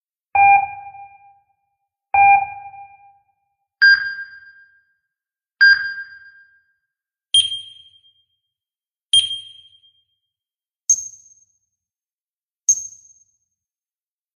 I generated a sine wave, added some reverb and other effects, and that's about it.